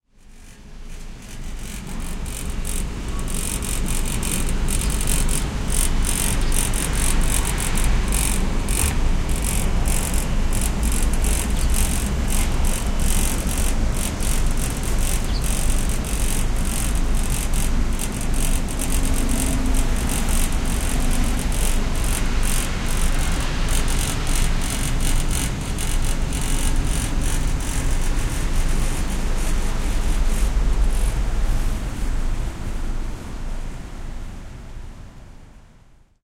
Air conditioner making a rattling sound. Subtle sounds of cars passing by in the background. Recorded in Seoul, Korea. Later edited and normalized.
korea, rattle, field-recording, mechanical, seoul